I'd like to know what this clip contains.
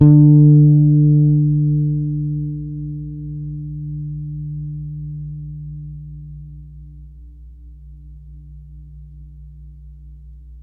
This is an old Fender P-Bass, with old strings, played through a Fender '65 Sidekick amp. The signal was taken from the amp's line-out into the Zoom H4. Samples were trimmed with Spark XL. Each filename includes the proper root note for the sample so that you can use these sounds easily in your favorite sample player.
bass, fender, finger, multisample, p-bass, sidekick, string